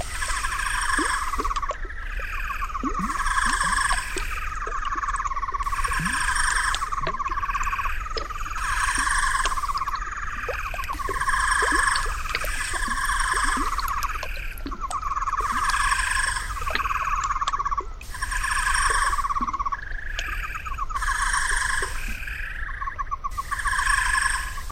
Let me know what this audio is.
Alien swamp
Alien ambience that resembles "swamp" sounds usually heard in works of fiction.
Made by modifying some of my recordings (crickets chirping and water lapping).
Aside from crickets, no other animal species were recorded to create this sound.
•Credit as Patrick Corrà
•Buy me a coffee